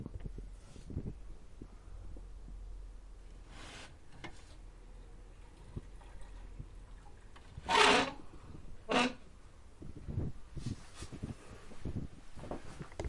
old window02
relic, window, vintage, metal, house, cracking, old